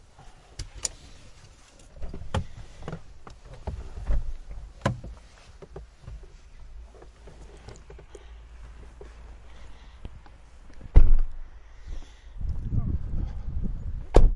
getting out of Hyundai I30 in heavy snow storm.